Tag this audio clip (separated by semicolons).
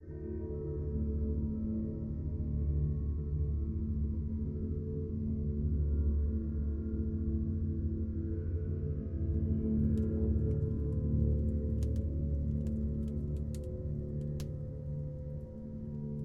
ambient; drone; eerie; evolving